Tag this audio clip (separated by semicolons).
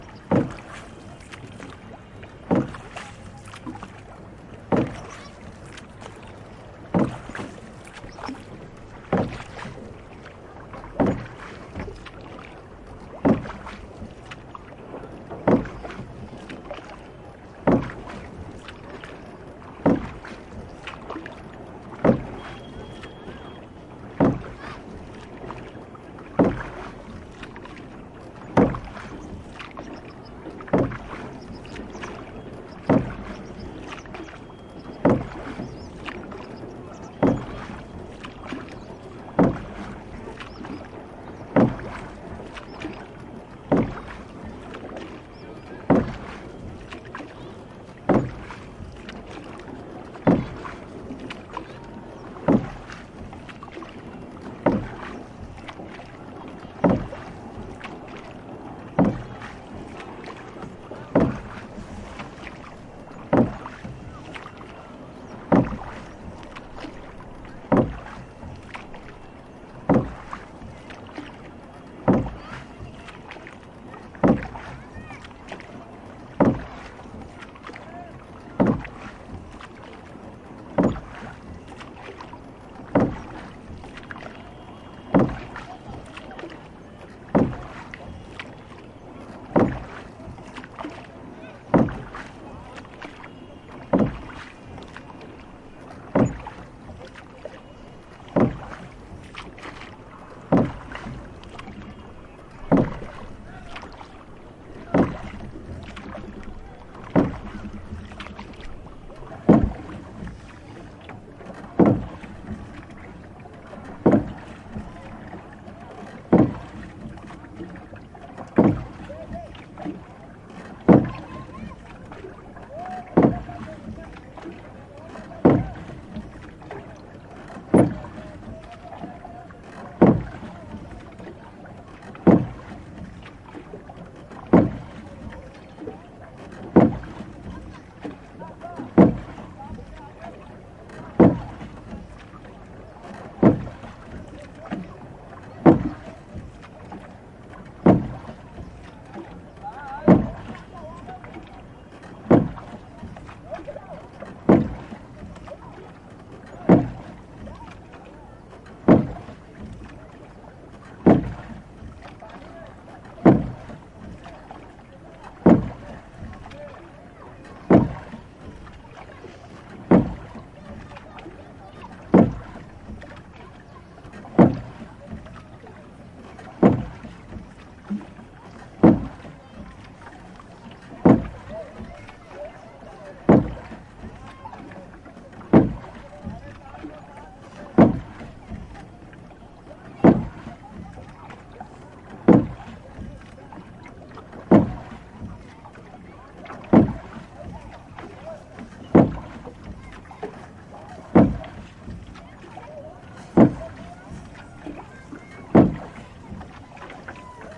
water; gange; india; boat; river